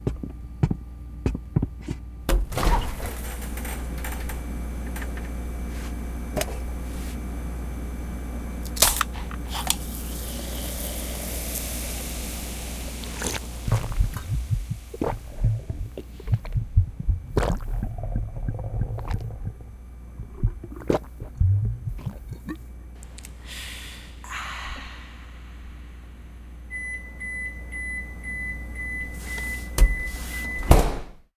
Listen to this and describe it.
thirsty soda can
a soda can is taken out of the fridge and opened up